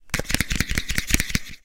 recording of the back of headphones being rubbed together